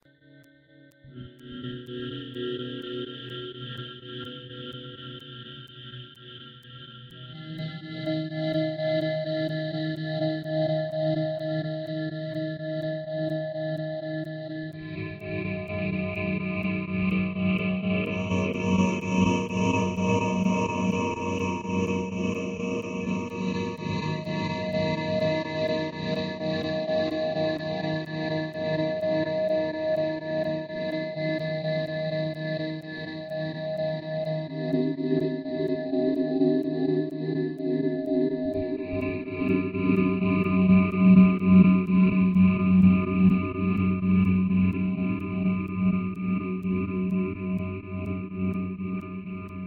SYnth NoisesAD
A small collection of SYnthetic sounds of varying frequencies. Created with amSynth and several Ladspa, LV2 filters.
Hope you enjoy the sounds. I've tried to reduce the file sizes due to the low bandwidth of the server. I hope the quality doesn't diminish too much. Didn't seem too!
Anyhoo... Enjoy!
Noise, Rhythmic, Synthetic, fictional, weird, machines